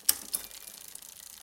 bike click
Shifting gears on mountain bike
shift, bicycle